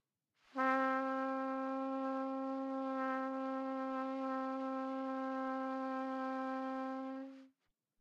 overall quality of single note - trumpet - C4
Part of the Good-sounds dataset of monophonic instrumental sounds.
instrument::trumpet
note::C
octave::4
midi note::48
tuning reference::440
good-sounds-id::1421
single-note, good-sounds, C4, neumann-U87, multisample, trumpet